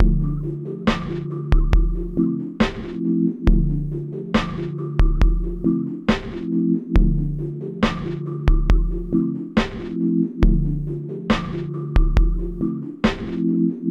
Calm loop BPM 69
Tranquil loop on D Dorian BPM 69
tranquil, cinematic, chillout, loop, downbeat, chill, slow, music, downtempo, calm